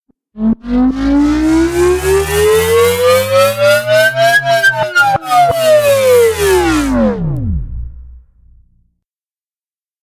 Electric Engine I
Sound of an engine powering up and powering down. Made with Studio One and all kinds of effects.
Powerup, Device, Downriser, Engine, Powerdown, SciFi, Effect, Electric, Film, Drive, Riser, Motor, Error, Rise, Movie, Fx, Sfx, Game, Malfunction, Transportation, Foley, Start